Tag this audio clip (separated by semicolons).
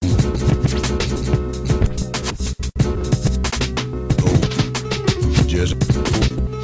record-scratch; turntablism